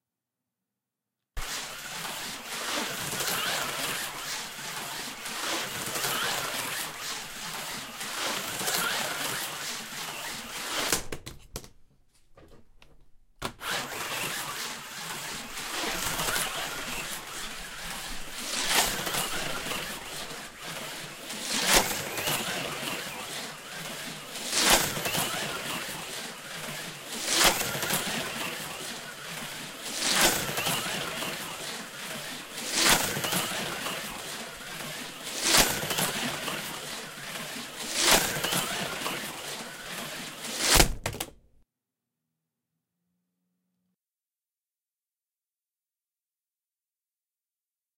RG HO Slot Car with Crashes
One HO scale slot car racing around a track with occasional crashes.
crash, electronic, electronic-car, racing, slot-car, toy, toy-car, track